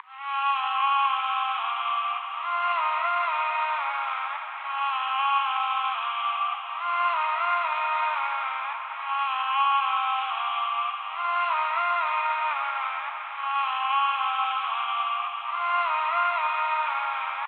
Dark Medieval Female Background Vocal - Bbmin - 110bpm
background, cinematic, dark, eerie, emotional, female, girl, hip-hop, hiphop, medieval, rap, scary, serious, spooky, trap, trippy, vocal, voice, vox, woman